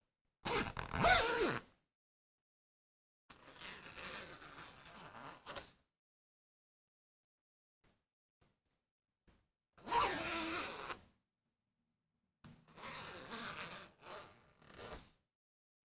Zipper up and down
Zip going up and down
zip, zipping, unzipping, zipper